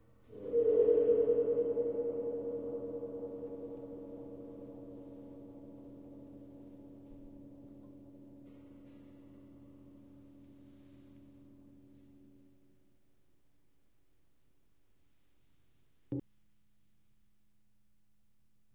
ghost gong
cool effect made by dragging a rubber tipped mallet across the gong, instead of striking it. Creates a soft eerie atmosphere.
gear: 2X AKG 451EB,2X RDL STM-2, Casio DA-1 DAT
gong, eerie, metal, sound, ghostly, percussion, effect